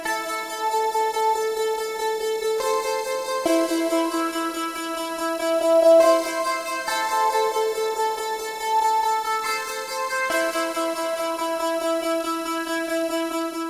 Texas Ranger 005
Electric dulcimer kind of synth part
electronica, thin